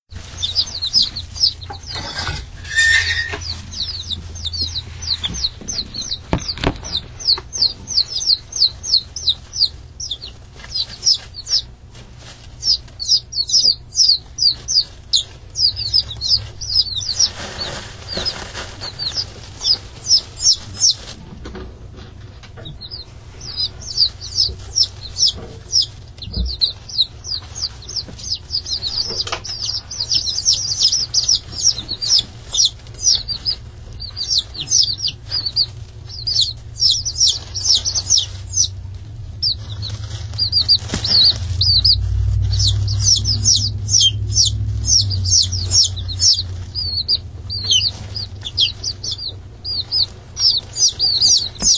Chicks Chirp3
Bantam chicks chirping and scratching, recorded using an Olympus VN-6200PC digital voice recorder. This is an unedited file.
chick, chicken, chirp, peep, peeping